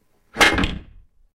smash on head with fire extinquisher
I needed a sound for someone getting hit on the head with a fire extinguisher. It's a fire extinguisher hitting a small concrete block wrapped in canvas. It doesn't sound quite right, but it makes a good starting point. It was recorded with a cheap condenser microphone onto a portable Sony MD recorder (MZ-N707).